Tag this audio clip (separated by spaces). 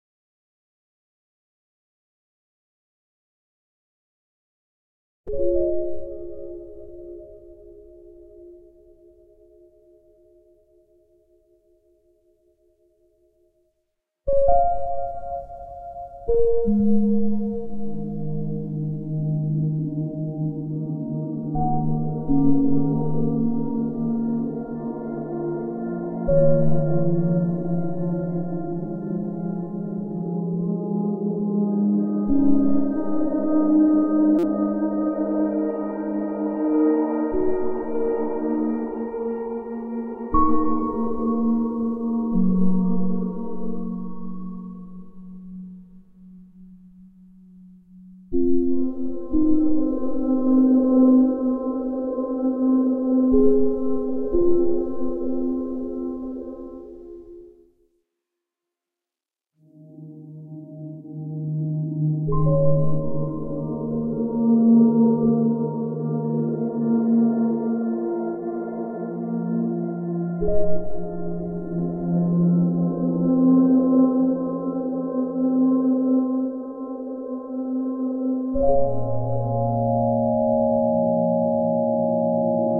bittersweet cinematic film melancholic melancholy movie procedural sad slow